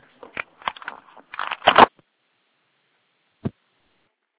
A sound of a hang up followed by a drop recorded from the caller's perspective. I used software call recorder.